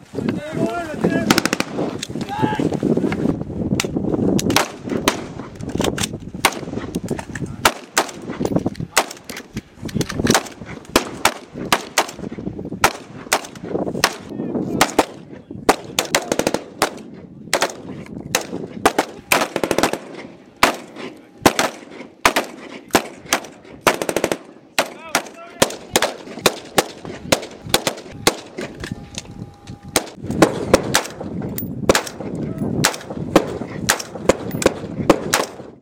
Windy Desert Gun Battle
Soldiers shooting at targets in the sand.